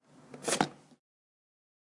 movimiento de subida de proteccion del casco
helmet, protection, up